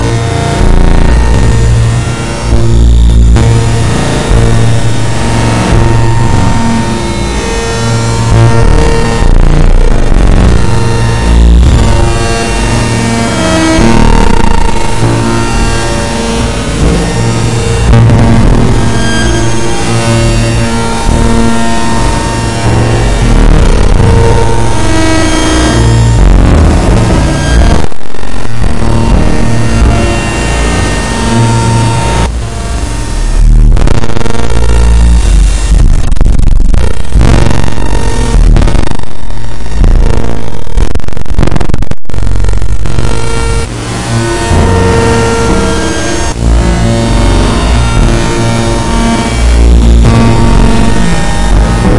extreme noise fltr2
WATCH OUT FOR YOUR SPEAKERS (and ears).A resulting sample of a very simple patch with just some noise~, saw~, comb~-filters and of course feedbackloops in a study of noise-filtering.
glitch, extreme, noise, loud, distortion, feedback-loops, distorted, industrial